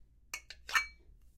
Sound of a small bucket being picked up from the floor.